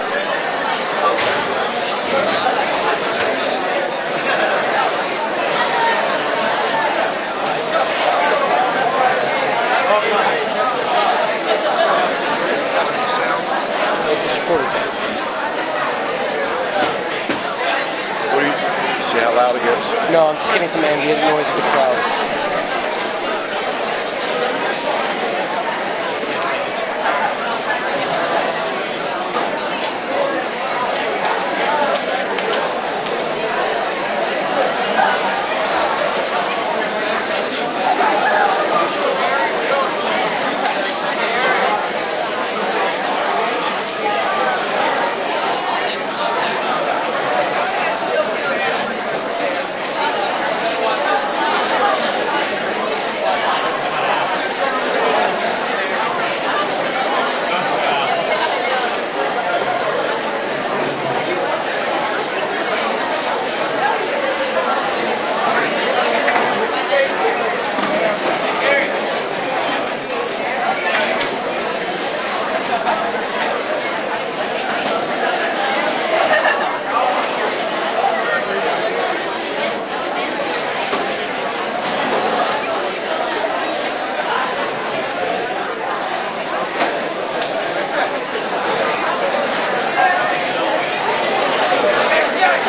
A noisy school cafeteria